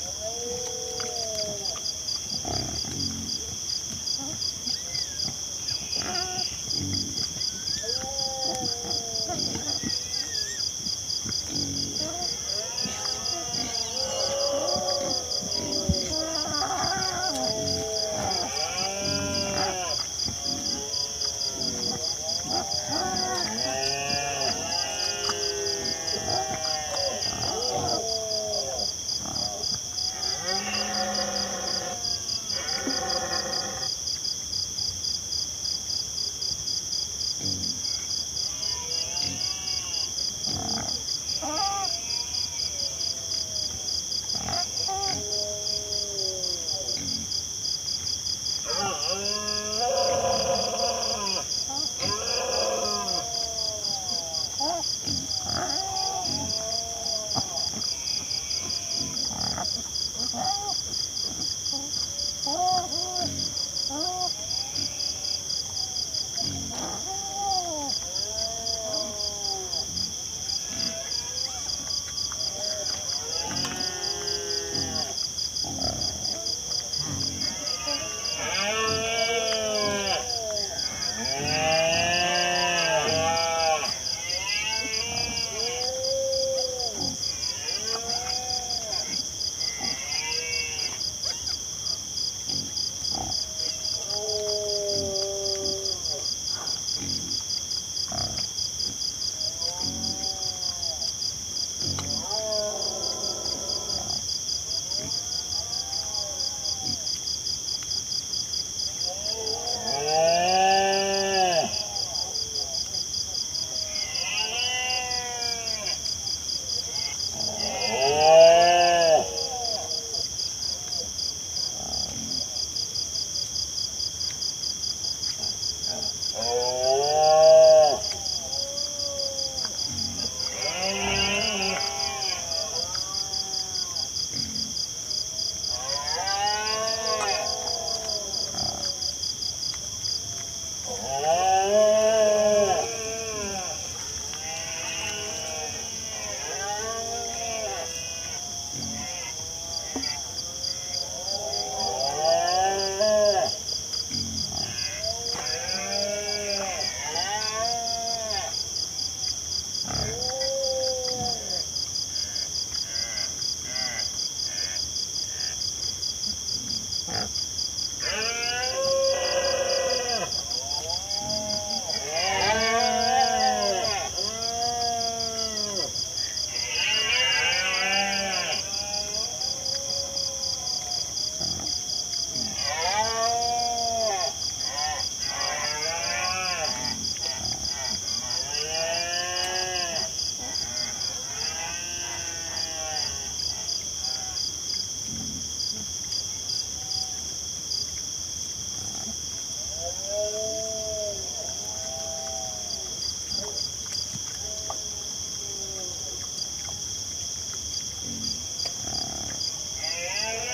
Quite scary if you are in a wild area in the middle of the night, and you don't know WHAT makes these noises. Wild pigs rooting around and squealing, while male Red-deers roar and crickets sing. Mic was a Sennheiser ME62 on a K6 system.
night, wild-boar, rut, field-recording, growl, nature, red-deer, scary, squeal, south-spain, ambiance, scrub, donana